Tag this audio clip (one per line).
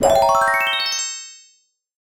found
game
item
whosh
magic